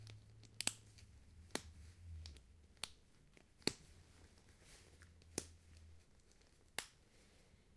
Here I tried to collect all the snap fasteners that I found at home. Most of them on jackets, one handbag with jangling balls and some snow pants.